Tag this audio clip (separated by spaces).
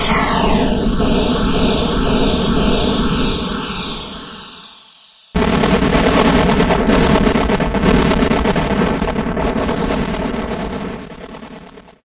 explosion video artillery games war bomb boom game explosive destruction army military